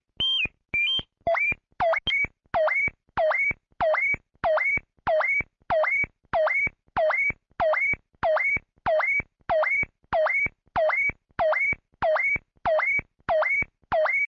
beat with kaoos
kaoos, mix, sample